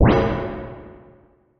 fweeng + Reverb
A swooshy wishy woshy wow zoom wooo sound. Weirdly eerie. Could serve as an artsy teleport sound, or a success jingle for a kinda messed up game.
eerie, level, weird, dark, air, wind